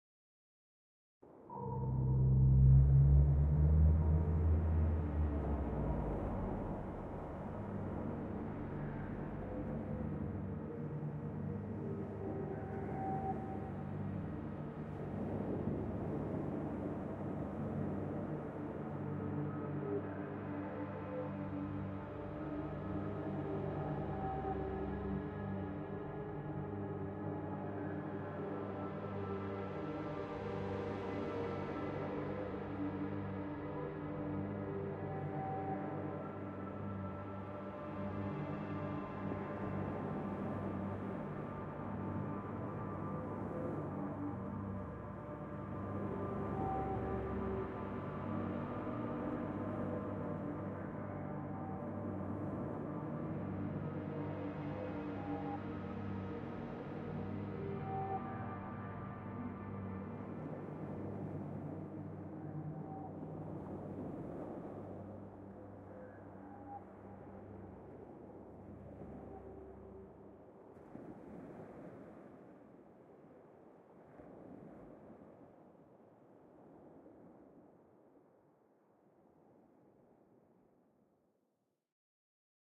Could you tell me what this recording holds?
DroneFX Dark Sea
Dark drone FX
Ambience, Atmosphere, Cinematic, Dramatic, Drone, Film, Free, FX, Horror, Movie, True-Crime